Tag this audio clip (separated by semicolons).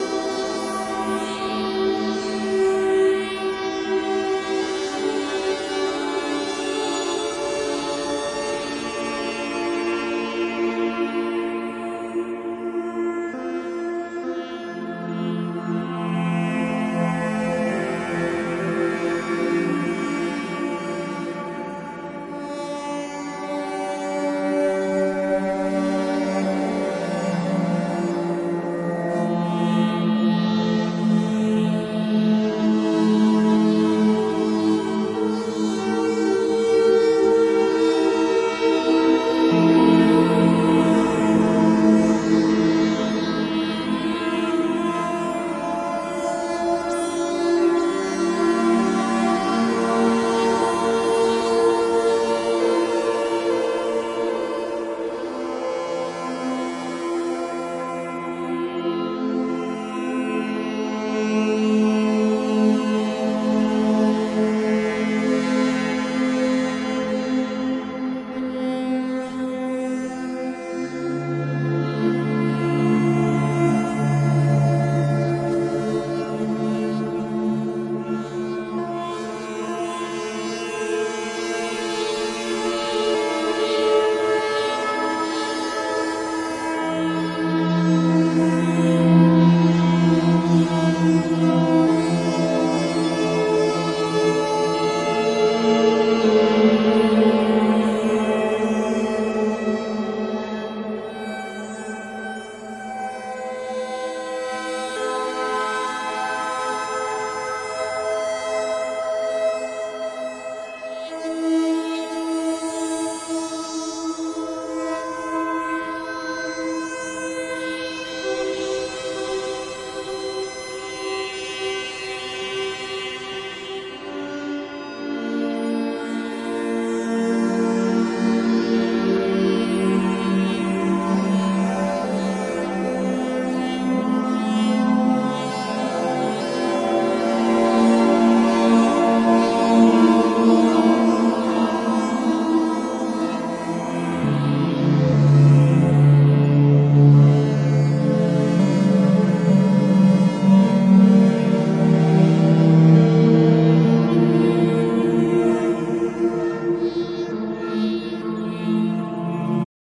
eurorack; mgreel; modular; morphagene